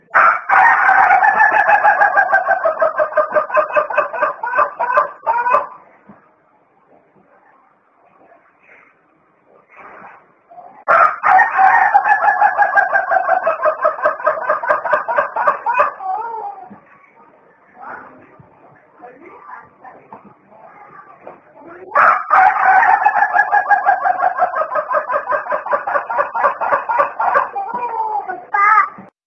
risada do galo
Audio de um galo arrepiante.
comedy, Risada